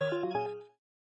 ui sound 14
could possibly be used to indicate a low battery(?)
application, computer, interface, menu, option, popup, question, ui, warning